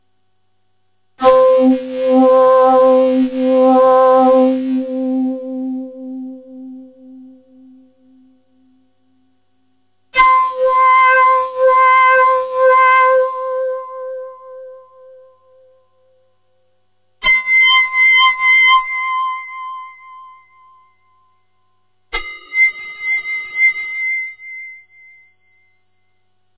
DX5 Peter Pan
Gathered from my Yamaha DX 5
dx5, fm, mono, synth, yamaga